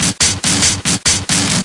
Cyberian Flowerbreak oo6
Several breakbeats I made using sliced samples of Cyberia's breaks. Mostly cut&paste in Audacity, so I'm not sure of the bpm, but I normally ignore that anyways... Processed with overdrive, chebyshev, and various other distortionate effects, and compressed. I'm somewhat new to making drum breaks, I'm used to making loops, so tell me how I'm doing!
drumbreak, hardcore, loop, drum, breakbeat